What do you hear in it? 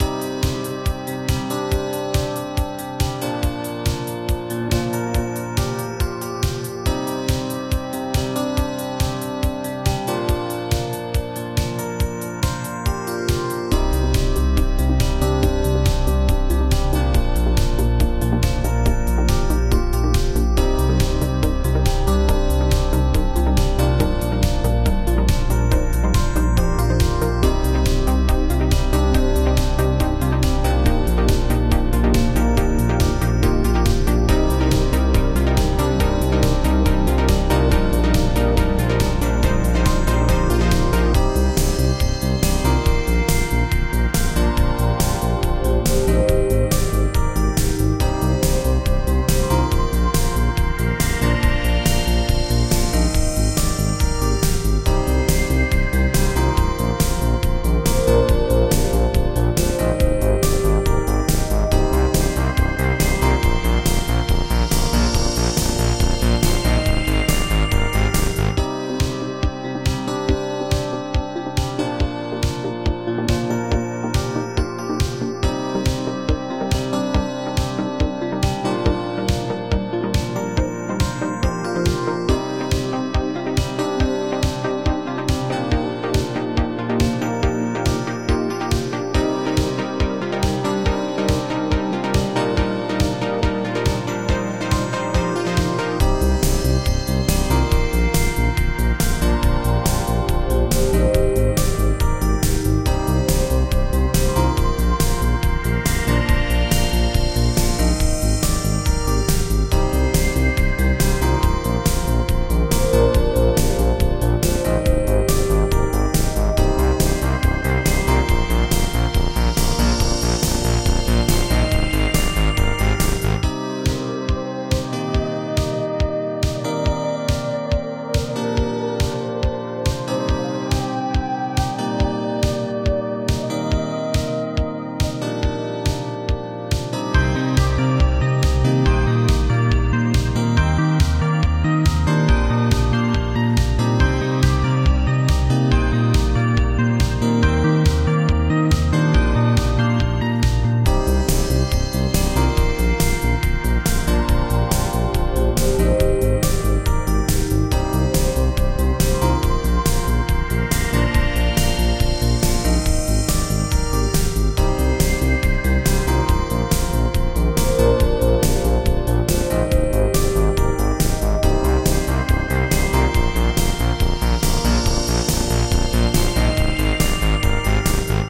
2020-04-27 Sythwave Vaporwave
Synthwave Vaporwave Dance Loop Created with Novation Peak and Korg M3
140 BPM
Key of F Minor
June 2020